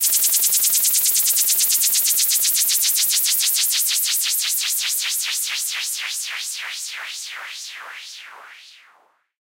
enigmatic noise sweep 2b
I was trying to make some fx you can hear in Enigma’s tracks (for example ‘The Eyes Of Truth’).
Made with Audition.
P. S. Maybe it’d be a better sound if you listen to a downloaded file.
sweep, noise, pitch-bending, filtered, Enigma, slowing